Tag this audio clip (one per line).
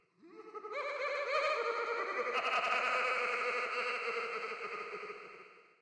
echo
ghost
terror
bogey
disturbing
horror
creepy
terrifying
maniac
horrible